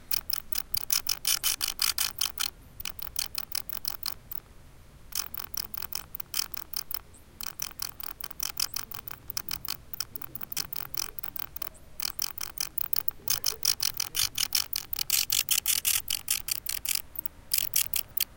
Some bats in a bad box at the ecological station Teichhaus Eschefeld at the nature reserve Eschefelder Teiche in Saxony, Germany.
I think they are gonna start soon to fly.
Recorded with a Zoom H2 in 90° mode...
Refugees welcome :)
peace
pillo